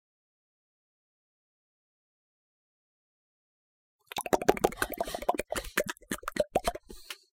Quick flopping of a tongue outside a mouth. Done to make a scary effect in a nightmare where someone's starting to have white eyes and moves its tongue incontrollably.
Recording by Víctor González.
clapping, rapid, Tongue, flicker